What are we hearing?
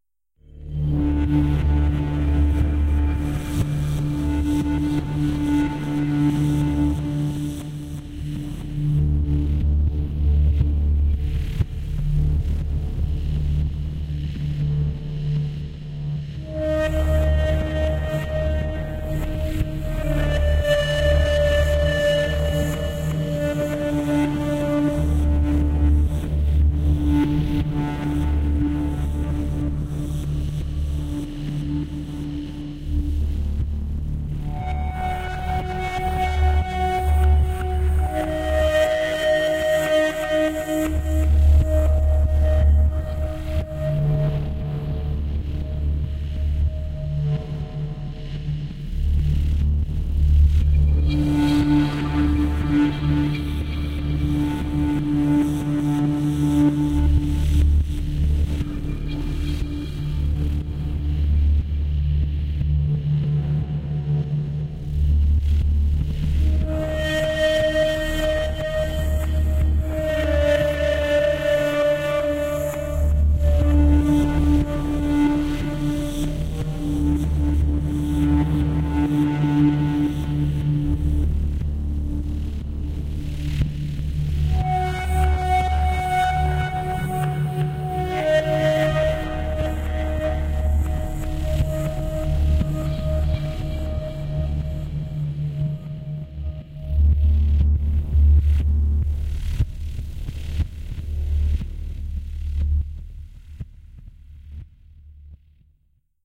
Exploring Dark Places - Atmosphere - by Dom Almond
Dark Atmosphere for game or video